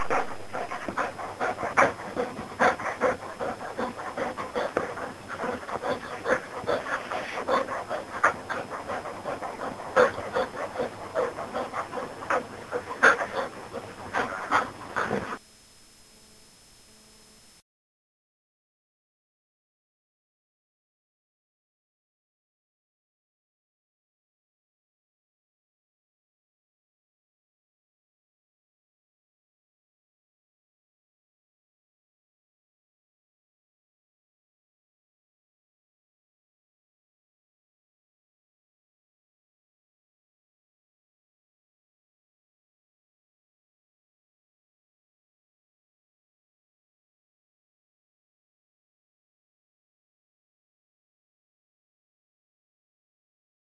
sounds from a dog looped and adjust down pitch
effects, funny, games, sfx, sound